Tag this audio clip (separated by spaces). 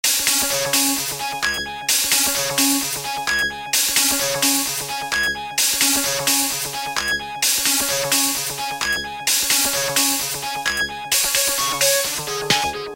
prism music loops electronic